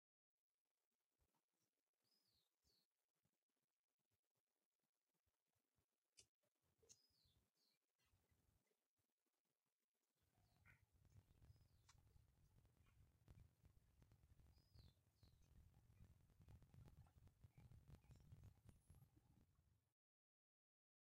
001-Room Environment
Environment, Quiet, Residential, Room